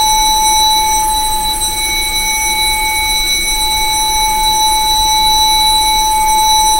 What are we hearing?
bell noise
approximation, bell, metallic, pad